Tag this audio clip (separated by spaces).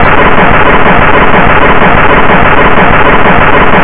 deconstruction glitch lo-fi loud noise